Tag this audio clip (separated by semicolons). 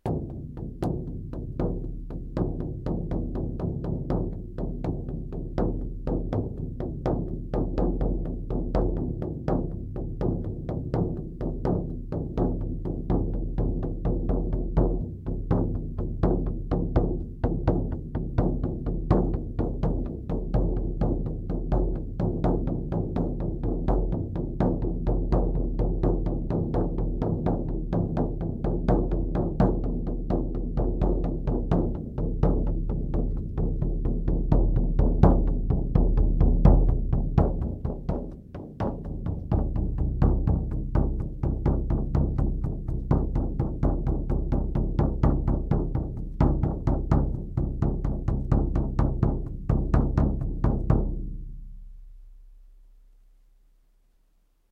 bodhran; drum; drums; frame; hand; percs; percussion; percussive; shaman; shamanic; sticks